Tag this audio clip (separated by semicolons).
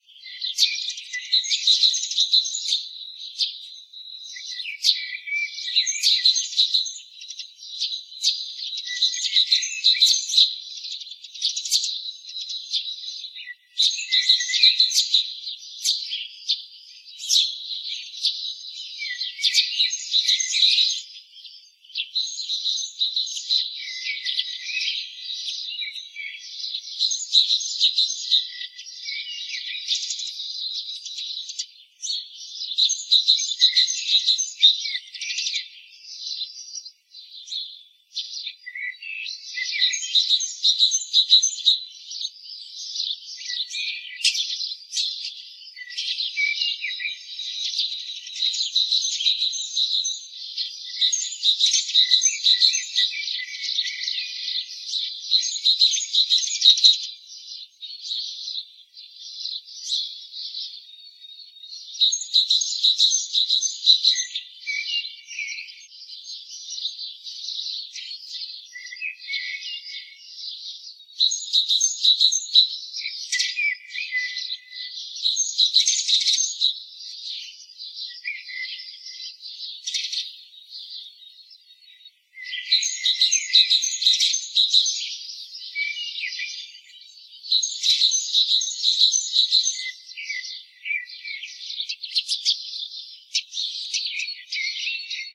spring; nature; ambiance; tweet; field-recording; sound; atmosphere; ambient; bird; background; call; birdsong; chirp; chirping; birds; ambience